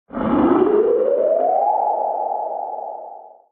Sounds like a 50's B-Movie of a space ship flying. This is an example of digital signal processing since this was created from recordings of random household objects in a studio.
Spaceship, Sci-Fi, UFO, Flying-Saucer, B-Movie